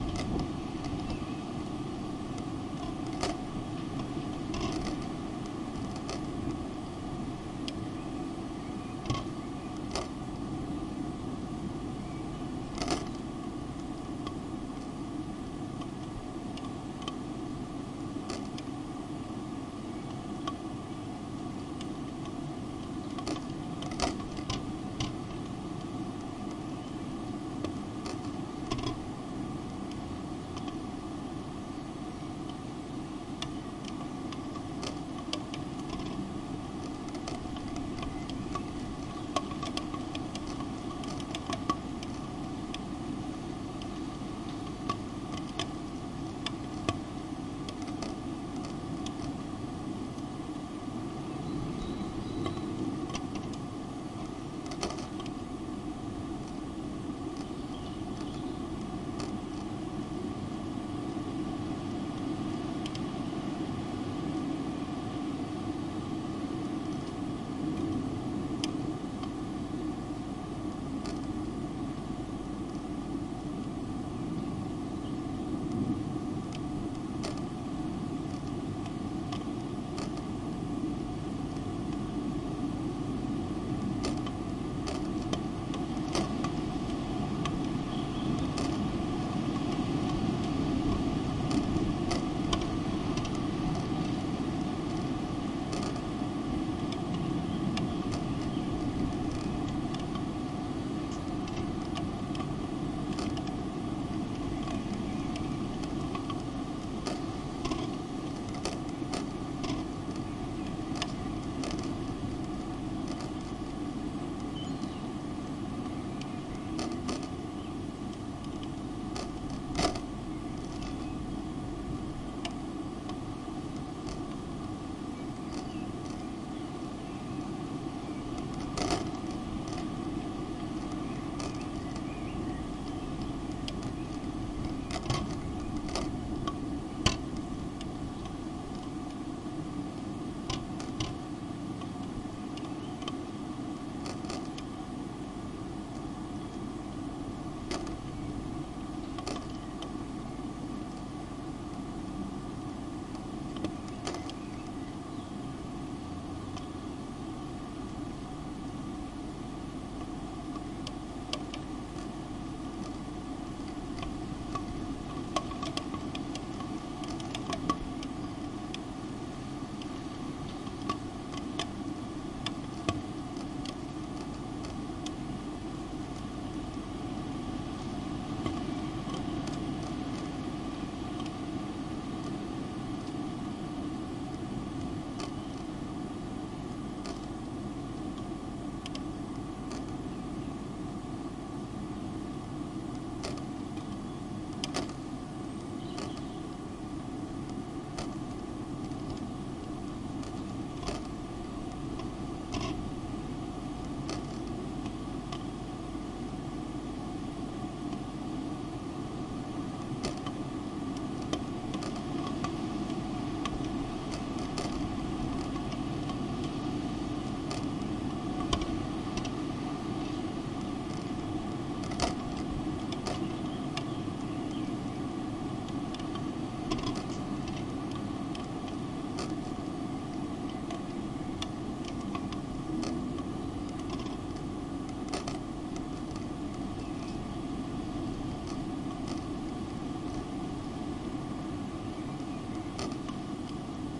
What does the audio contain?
extractor fan
A recording of my bathroom ventilation fan shutters clicking in the breeze. The fan is not on so you can hear birds in the distance, and the wind in the tube-shaped fan chamber. When the wind blows it catches the plastic shutters and they rattle and click. Recorded very close to the fan with two dynamic microphones, there is virtually no bathroom ambience detectable.
I'd be intrerested to know what this could be used for!
birds, shutter, ventilator, wind